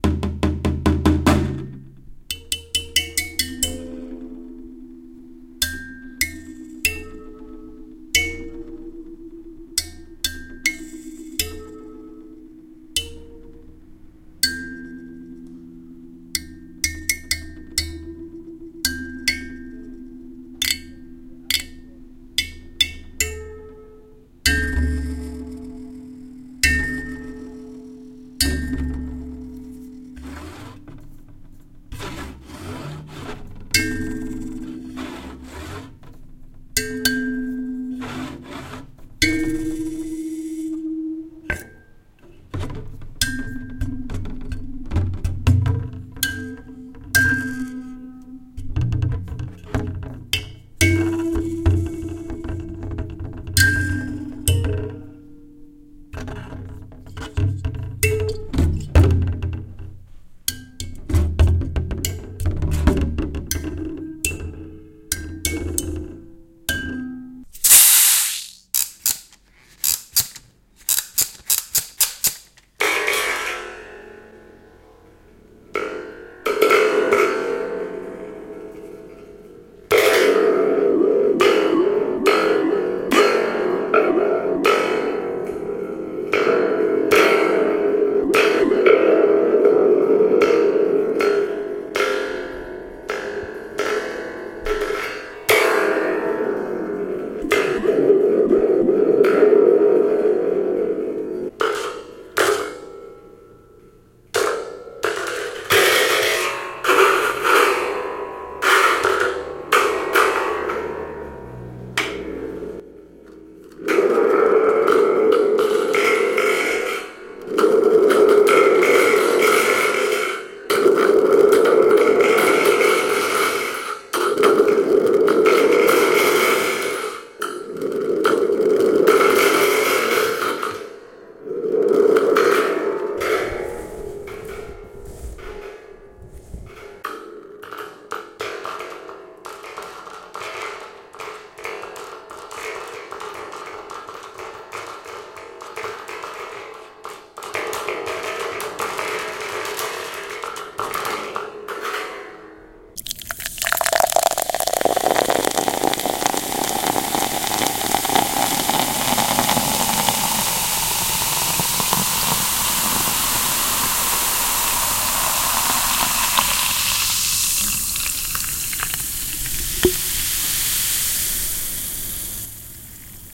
Tape & Microsound DROME Reel
Created and formatted for use in the Make Noise Morphagene by Walker Farrell.
Includes field recordings of a classic pin art toy, a couple of thunder tubes, a toy claw, a drum, a lamellophone, and pouring ginger ale.